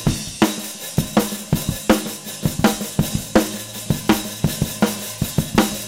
surf-loud-loop
A loop of a surf-like rock beat, with a somewhat open hi-hat
acoustic, drums, loop, real